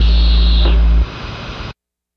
A steam pisten sound.
industrial, robotic, mechanical, machinery, factory, pisten, machine, robot